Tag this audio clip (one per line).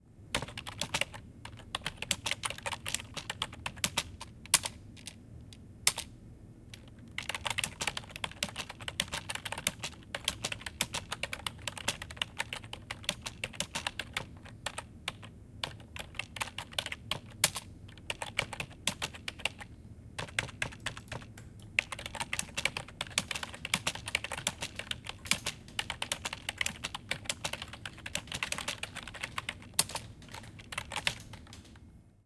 typing
mouse
campus-upf
computer-room
UPF-CS14
working
office
keyboard